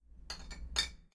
The sound of glass bottles touching.
Glass, Foley, Bottles